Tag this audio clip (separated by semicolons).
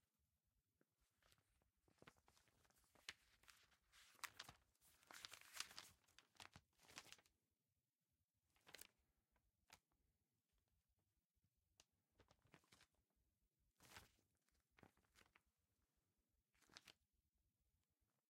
flipping; paper